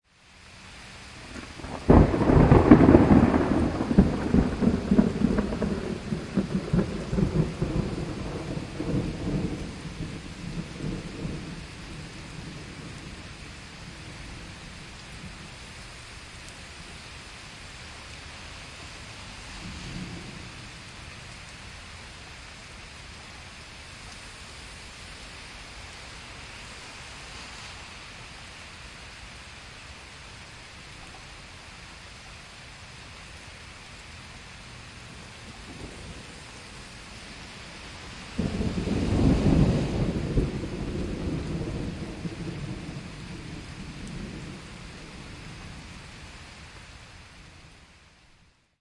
A heavy rain storm with some thunder, recorded with an iRig field, sitting in an open window.